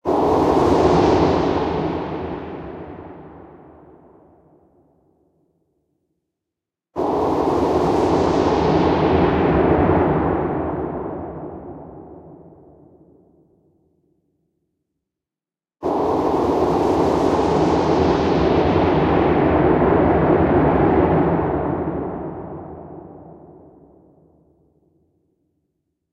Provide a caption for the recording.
Bubbles to Noise #4
A transition from a "bubbly" sound to noise, with a big reverb. Created using Logic synth Hybrid Morph.
Bubbles, Electronic, Futuristic-Machines, Hybrid-Morph, Noise, Sci-fi, Space, Transition